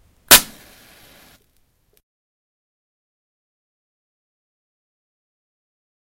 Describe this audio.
strike a match, Zoom H1 recorder